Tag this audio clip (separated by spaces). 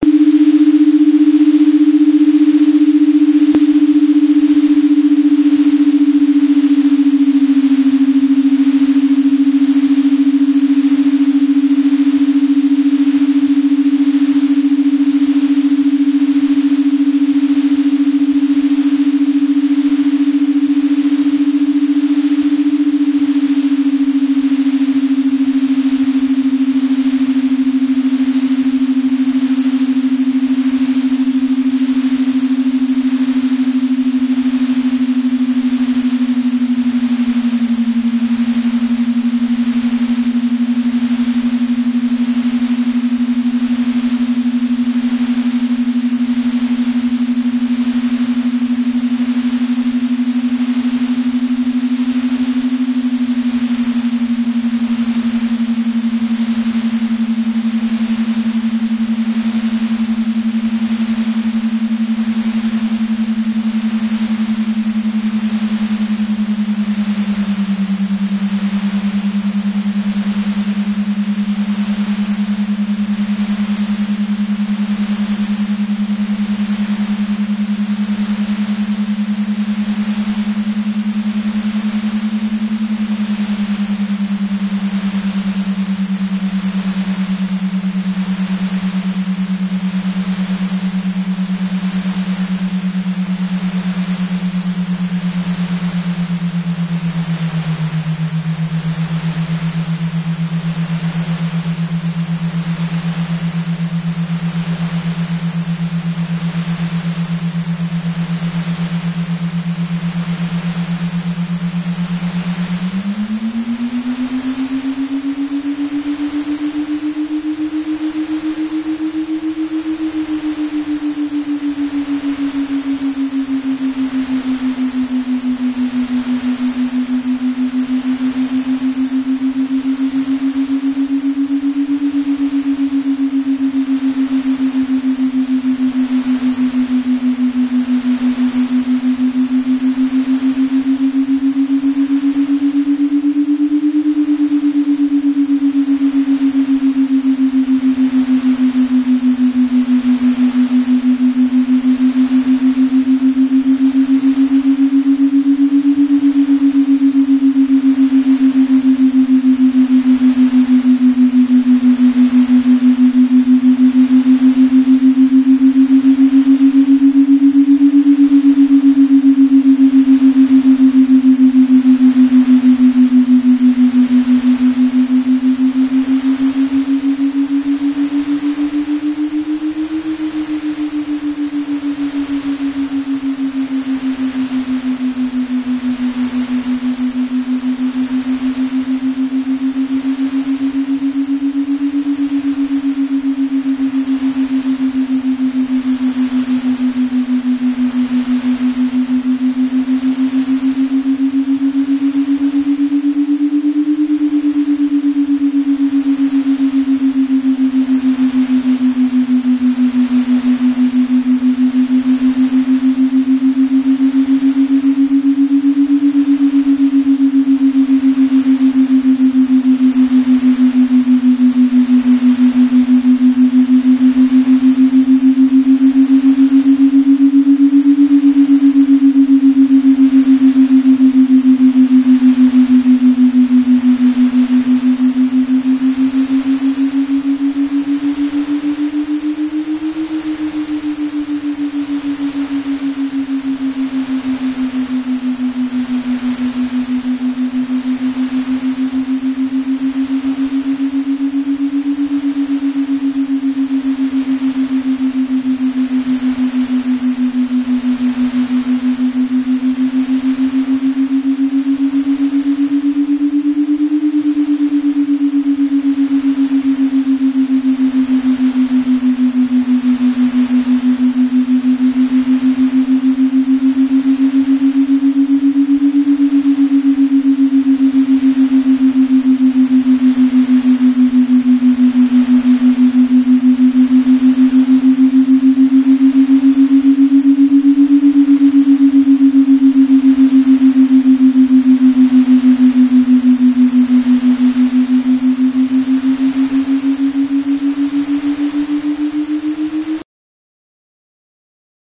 delta alpha beat brain gamma wave bianural